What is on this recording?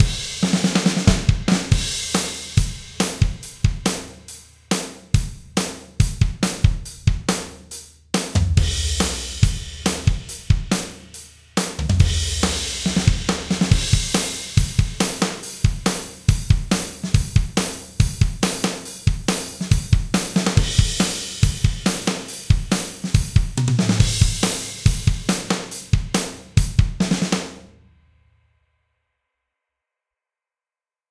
Hard hitting rock drums 140 bpm.